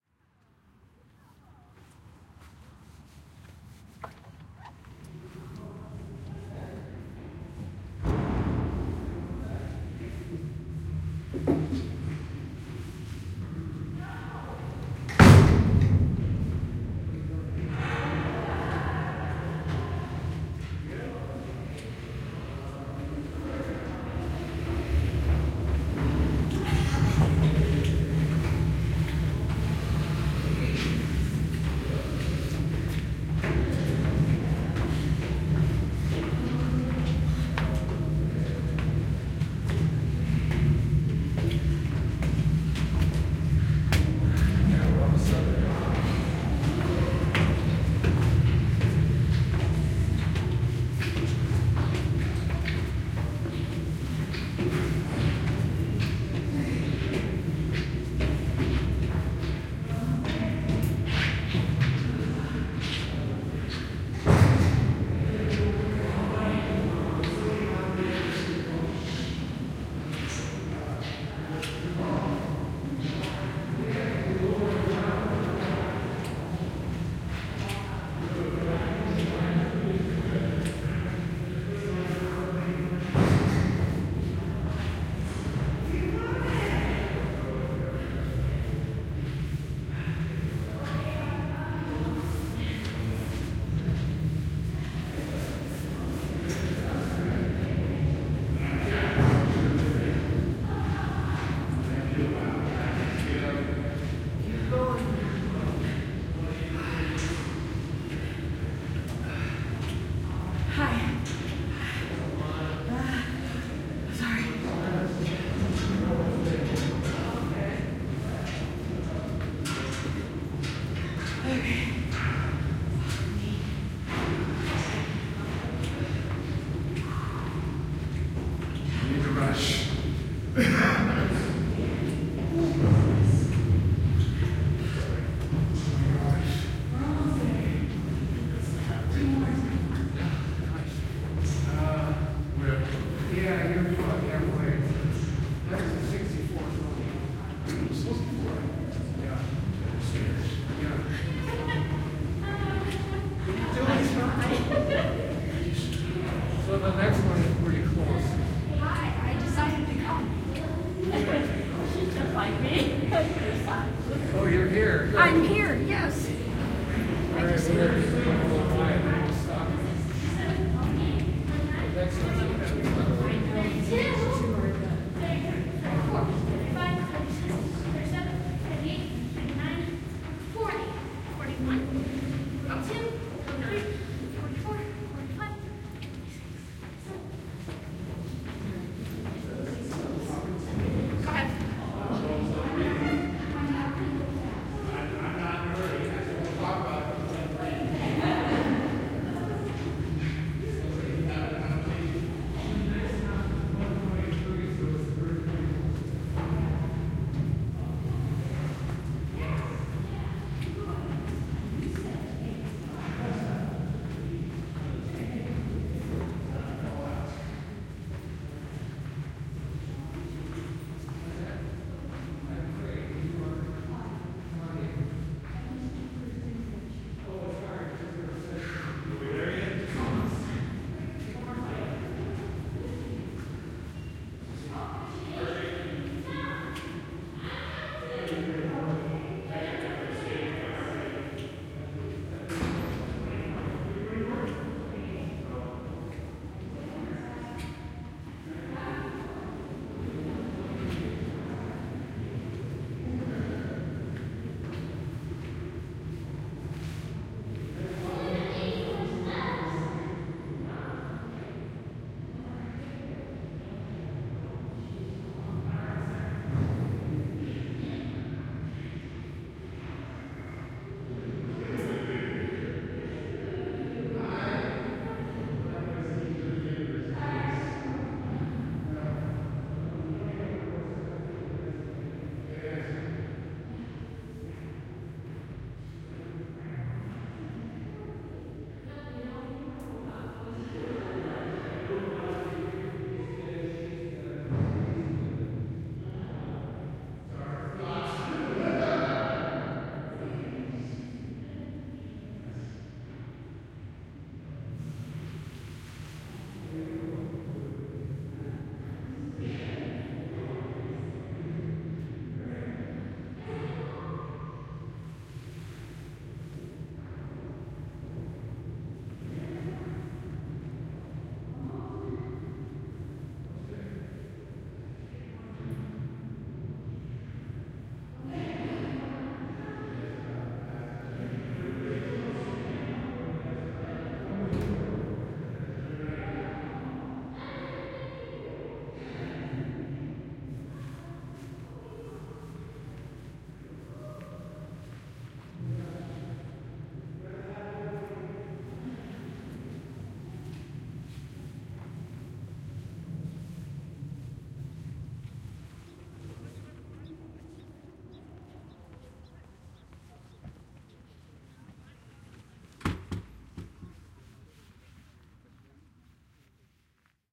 AMBPubl people walking up and down an echoey spiral staircase TK SASSMKH8020
Quite a few people walking up the 164 spiral steps of the Astoria Column as I walk down. It is quite narrow, crowded, and dizzying.
Microphones: Sennheiser MKH 8020 in SASS
Recorder: Zaxcom Maxx
ambience, crowd, echo, field-recording, people, reverb, spooky, talking, voices